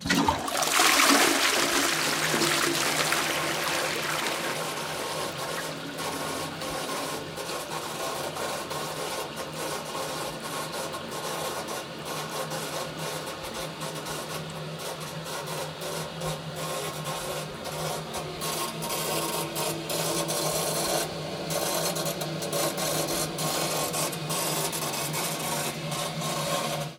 WC-Chasse d'eau2
A drive out of water from the toilet recorded on DAT (Tascam DAP-1) with a Sennheiser ME66 by G de Courtivron.